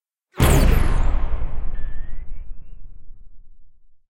Sci-fi cannon sound made by modeling sounds with vst NI Massive adding layers of various sounds made by me:
and a sound recorded by -dobroid- was added :
the sound mix was made in adobe audition
fiction, future, shooting, fight, shot, sci-fi, explosion, gun, defense, bomb, science, cannon, projectile, tank, laser, war, weapon, agression, military, alien, caliber, artillery, explosive, attack, warfare, army